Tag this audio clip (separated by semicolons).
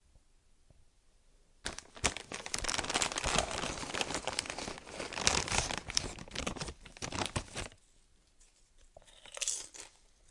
Bag; chips; sound